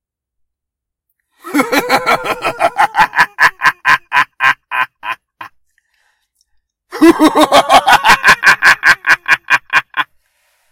Evil laugh.
Thank you!